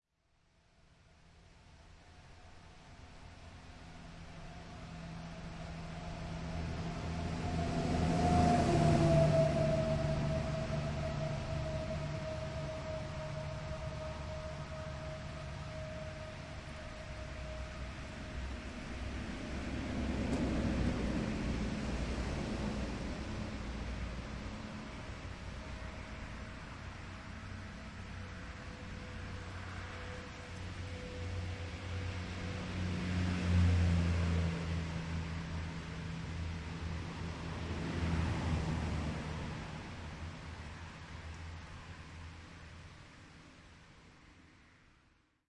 Bundesstraße Street
Street in Germany recorded with ZOOM H2, a bit of wind rushing in the background.